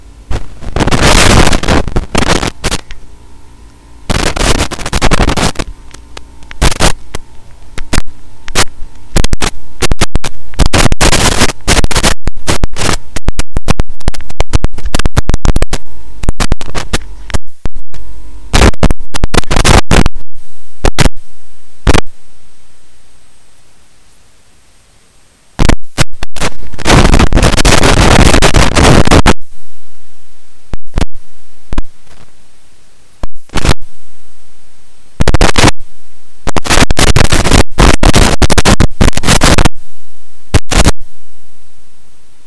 mic distortion
a broken microphone being moved around to make glitch distortion sounds. warning: loud